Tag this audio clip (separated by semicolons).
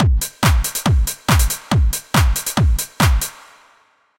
drum; techno; beat; progression; drumloop; trance